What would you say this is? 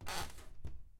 Creaky Door Closing
cabinet, close, creak, Door, foley